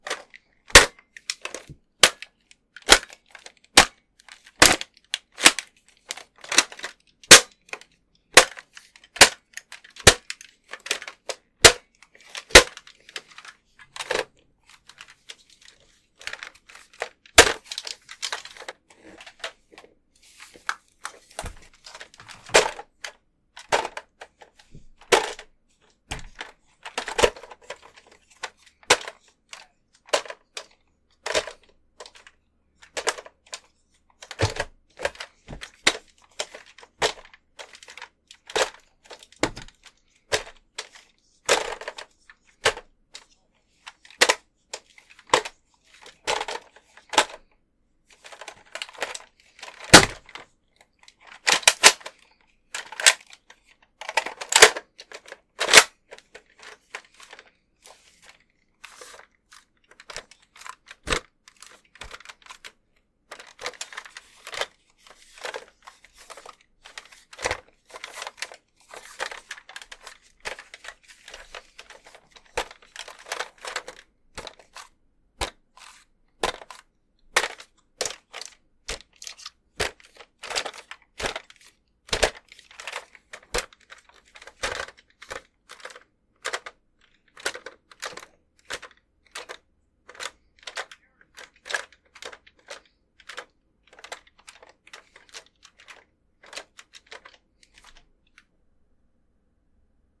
plastic toy dart gun hits
me moving parts of a dart gun around to make interesting sounds. recorded at my desk